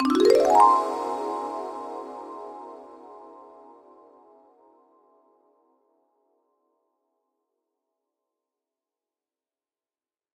Intended particularly for RPG games with medieval themes.
You might also want to use it for contests when someone picks the correct answer or gets some achievement, or the correct answer is revealed.
I thank GAMEDRIX for the marimba pack.